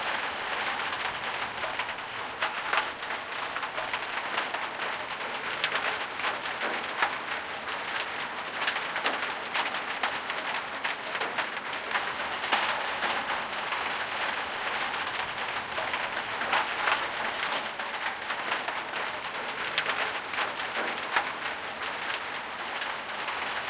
Rain On a Tin Roof LOOP
At the request of another freesounder, here is an infinitely-seamless-loopable version of "Rain_On_a_Tin_Roof". Details - My front veranda roof is tin and it was raining so I stood under it holding a Rode NT4 mic in close proximity to the underside of the roof. Filtering this sound will change the perceived roof type. This is a stereo recording. The NT4 was connected to an Edirol R-09 mic-in (15 level setting).